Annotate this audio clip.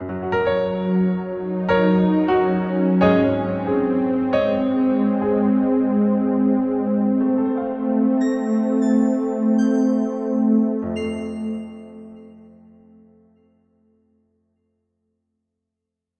Piano, synth, resolving chord pattern in 3 parts. Gentle feel, slow tempo.
trailer, film-production, intro